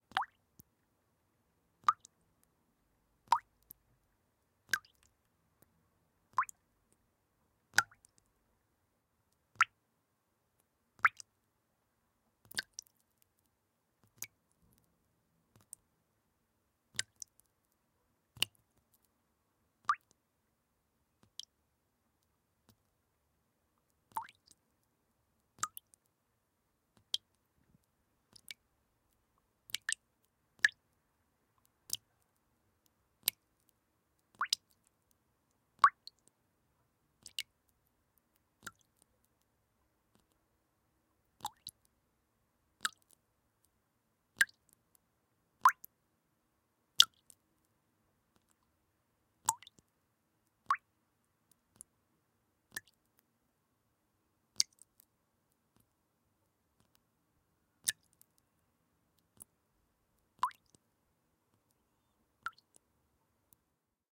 Water droplets
Water, ambience, droplets, field-recording